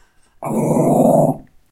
Growling Dog 2
Jack Russell Dog trying to bite something.
animal, attack, attacking, barking, bite, biting, dog, fight, fighting, Jack-russell, lurching, woof, woofing